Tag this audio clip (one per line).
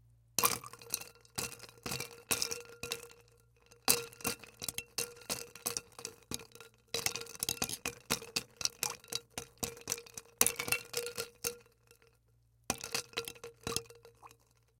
container
ice
Jabbing
liquid